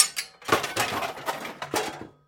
Impact-Misc Tools-0004
This was taken from hitting a group of wall mounted tools in my garage. In this one things fall off the wall.
Collapse, Debris, Fall, Knock, Stab, Wood